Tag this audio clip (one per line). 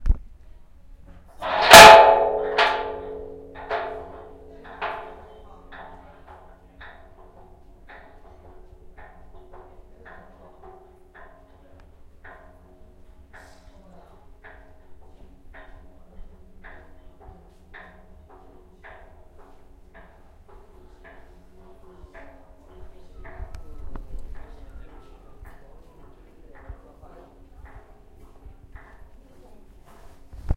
Copper
cylinder
Imperial
sound
The